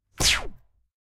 A hyper realistic "silenced gun shot".
Among the layers for creating this sound were; hitting bath tubs, water bottle sprays, a door lock and punching a phonebook... So no real guns then.
Gun, Hyper-Realistic, Shot, Silencer